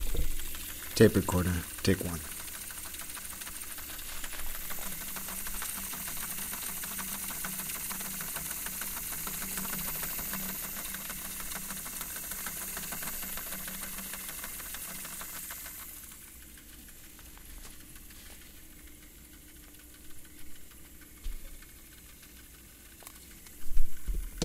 Zoom 6 recording of an old portable mini-cassette audio recorder with low battery rewinding.